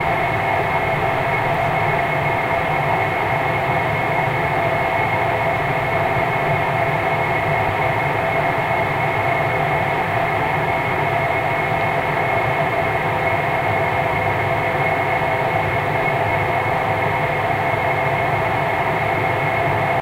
Contact mic recording
recording, metal, field, contact, mic